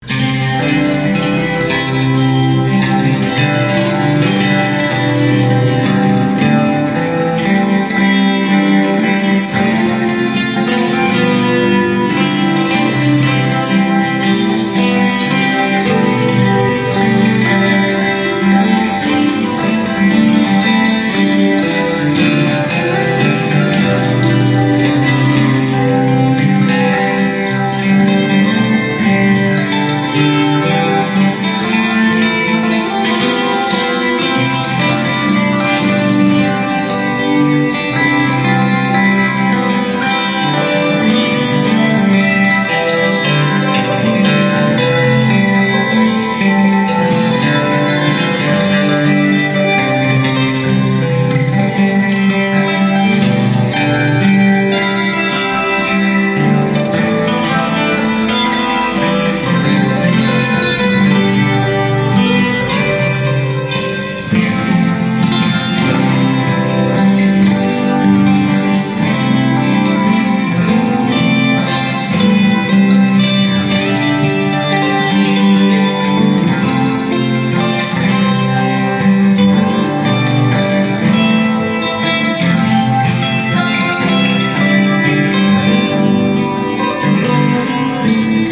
This kind of music always takes me back home to the hills of the Adirondack Mountains. 126 BPM Key of C major.
Loop, 126, Acoustic, peaceful, Folk, BPM, chill, Guitar, relaxing